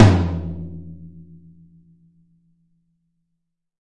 lo tom
tom, tom tom, drum kit tama percussion hit sample drums
drum, tom, tama, hit, kit, sample, drums, percussion